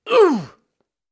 Damage Hit Voice Vocal
Getting hit by something and screaming.
scream, man, human, expression, vocal, videogame, voice, vocals, damage, male, hit